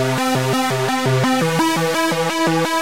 Riff 2 170BPM
a short synth riff loop for use hardcore dance music such as happy hardcore and uk hardcore.
hardcore, riff, synth, 170bpm, loops